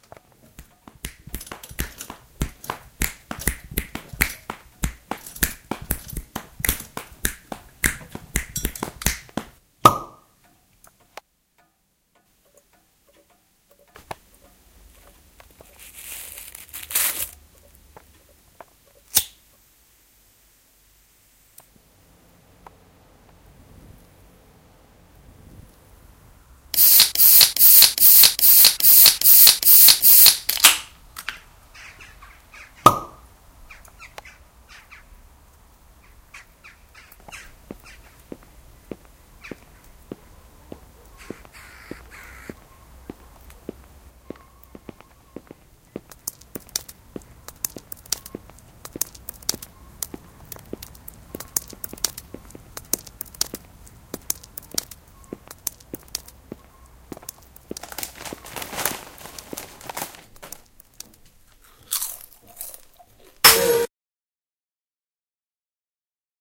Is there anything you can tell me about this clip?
SonicPostcard WB Emma
Here's the SonicPostcard from Emma, all sounds recorded and composition made by Emma from Wispelbergschool Ghent Belgium